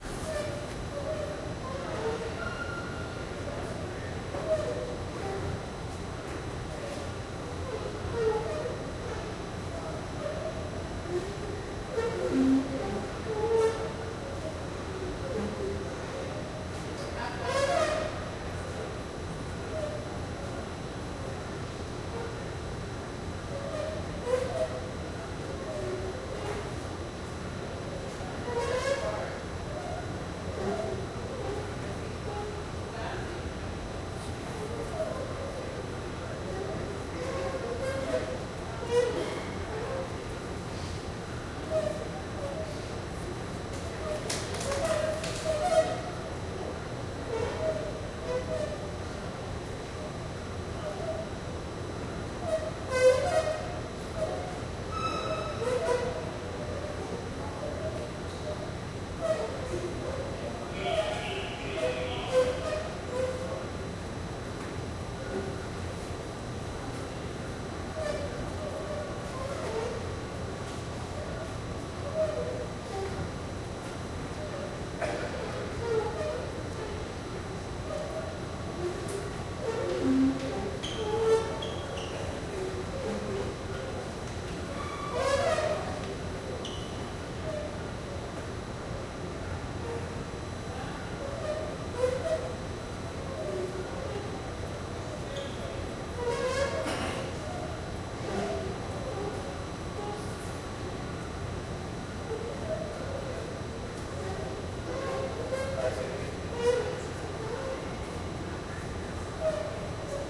Sound from subway escalator in Five Points MARTA station, Atlanta, GA, USA. Recorded on November 24, 2016 with a Zoom H1 Handy Recorder.